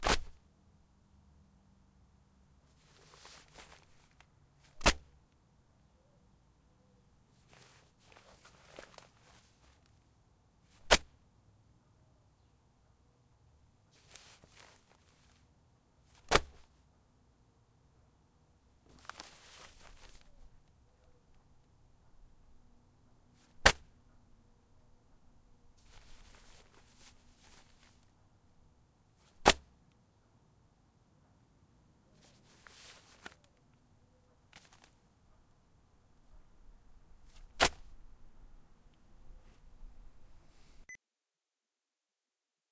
newspapers large soft
gently tossing a large package of newspapers onto a porch
newspaper, soft, toss, large